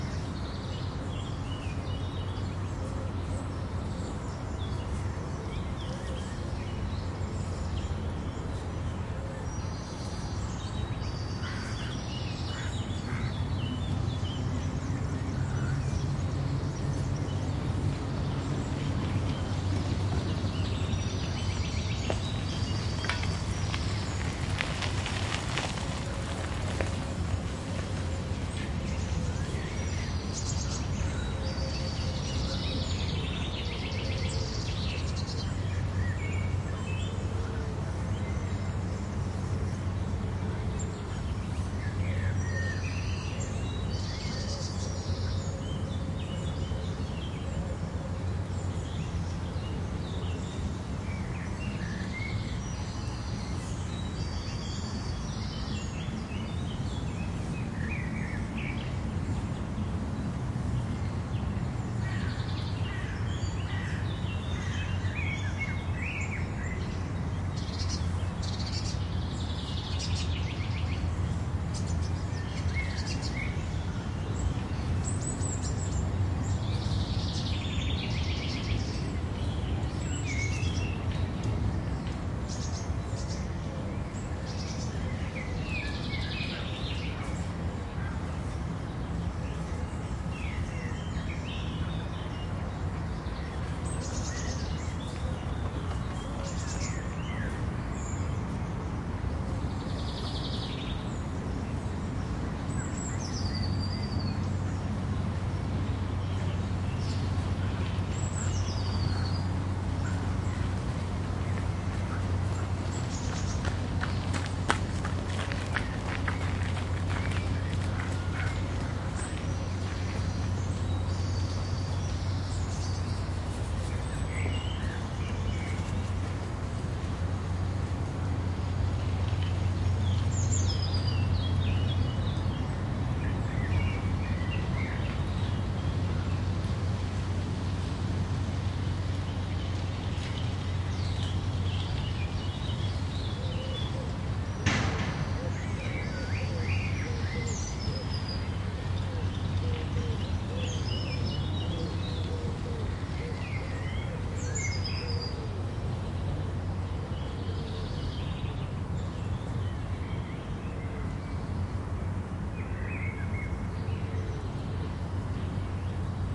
140614 LpzPark Center F
Field recording in the center of a city park between the boroughs of Lindenau and Schleussig in the German city of Leipzig. It is early morning on a fine summer day, birds are singing, and people making their way to work or where ever on the gravel paths, walking, jogging or on their bikes. Distant city traffic can be heard in the background.
These are the FRONT channels of a 4ch surround recording, conducted with a Zoom H2, mic's set to 90° dispersion.
ambiance, ambient, atmo, atmos, atmosphere, backdrop, background, birds, city, Europe, field-recording, Germany, Leipzig, park, peaceful, soundscape, summer, surround, urban